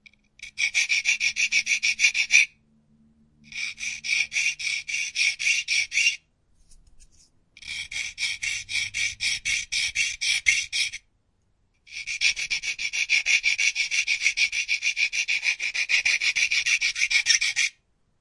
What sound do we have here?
File on plastic
Recording of the file for my scale model kits.
rub, rubbing